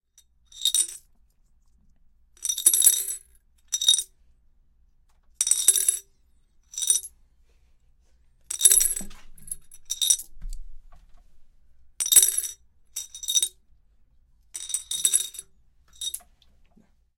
25. 2 Monedas en un frasco
frasco, monedas, moneda